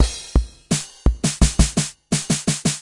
More drum loops made freeware drum machine with temp indicated in tags and file name if known. Some are edited to loop perfectly.